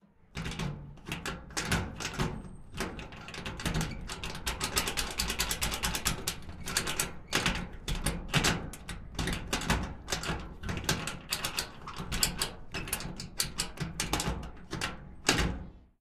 Rattling Locks
bolt, clang, close, door, lock, locking, metal, rattling, unlock, unlocking